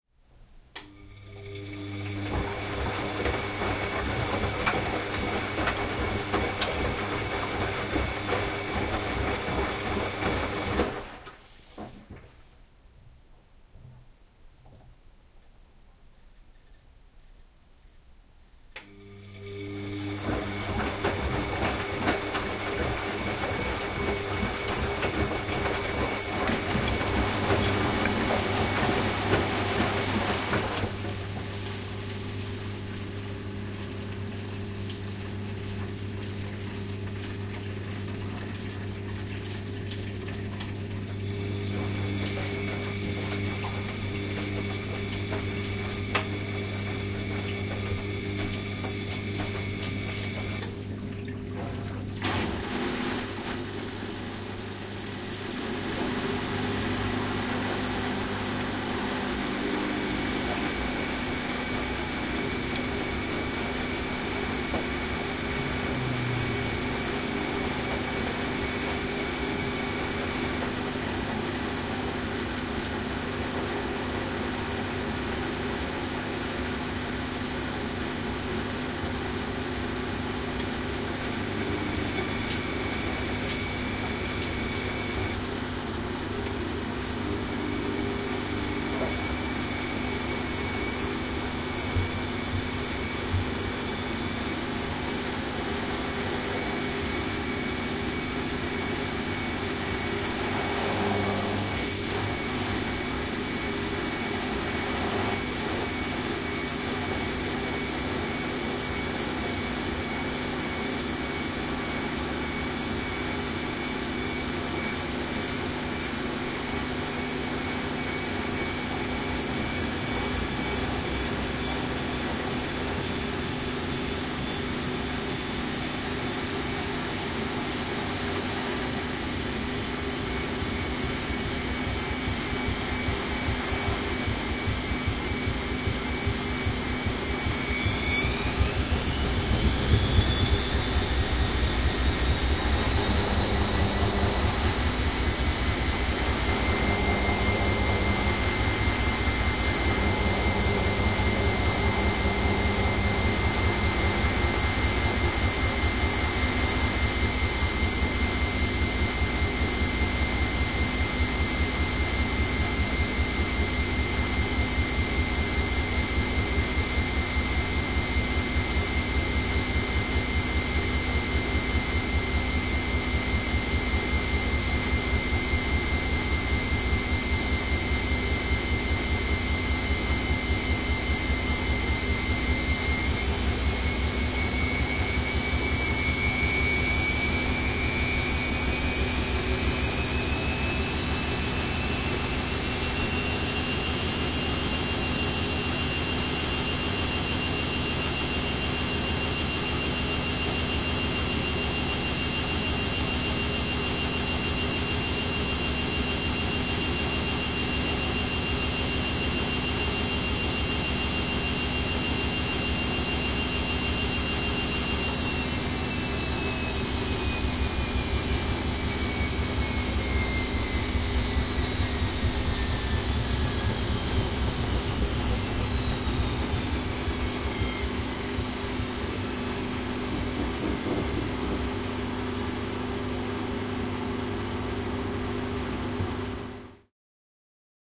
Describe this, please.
Washing Machine Rinse, Empty, Spin
Washing machine rinsing, emptying out and doing a spin cycle.
domestic-appliances, rinsing, spin, spin-cycle, washing, washing-machine, water